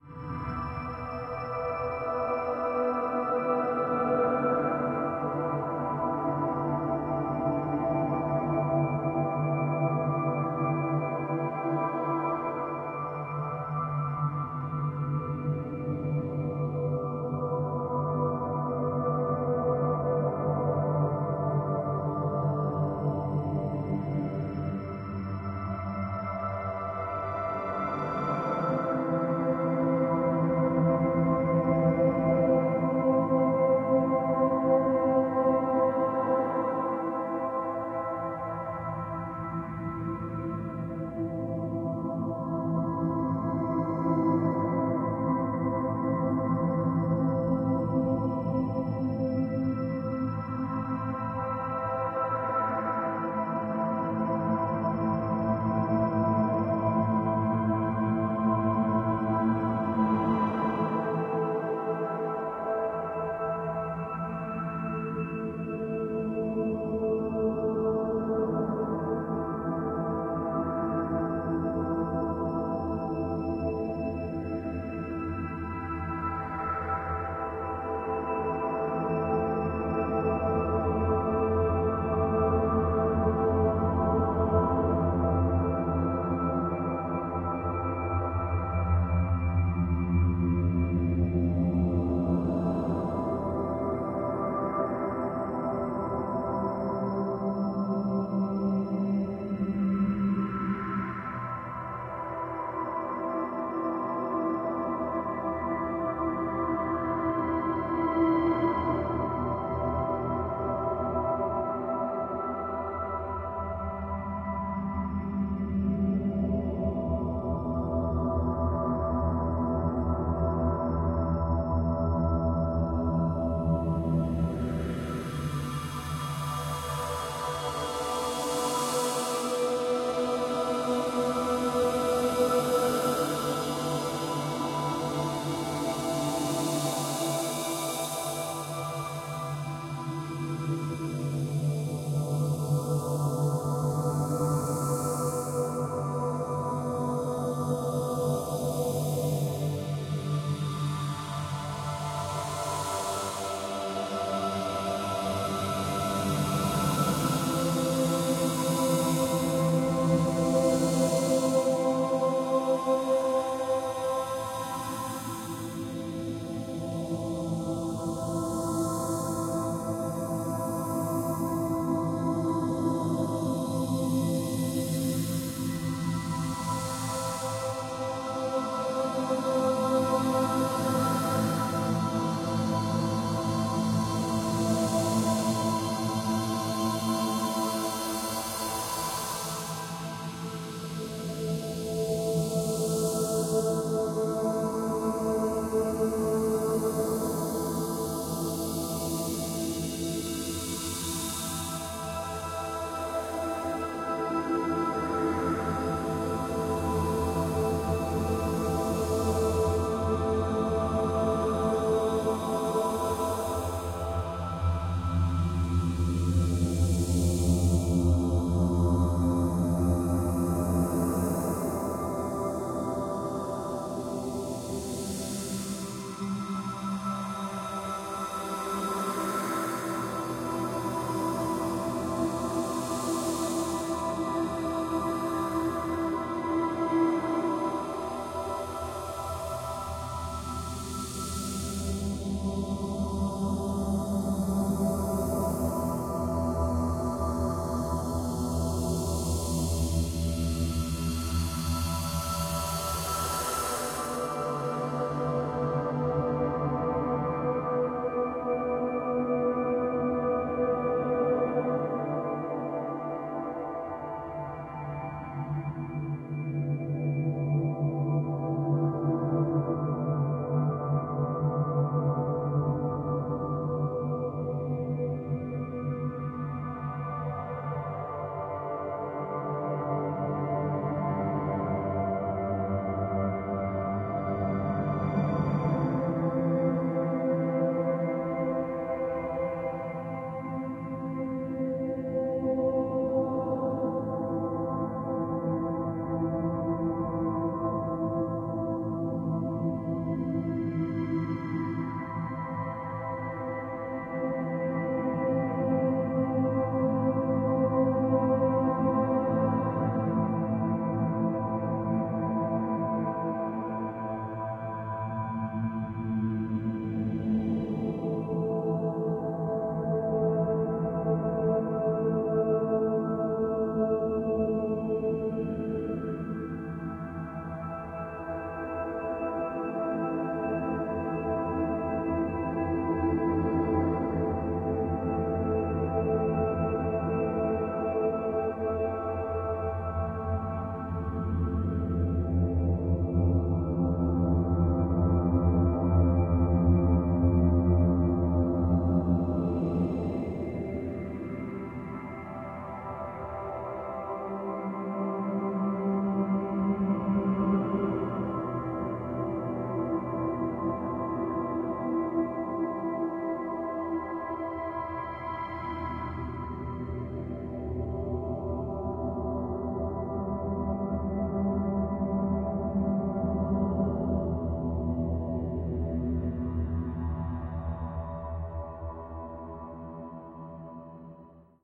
Guitar and percussion slowed down and reversed, with added a very tiny amount of echo, reverb, and a slow phaser. Then stretched and and stretched again. All the processing was done using Audacity (v.2.3.3).
The effect is a relaxing, heavenly ambience that sounds like it has some choral background element.
It would be interesting to see what you can make of it.
ambience, ambient, atmos, atmosphere, heavenly, sci-fi, sound-design, soundscape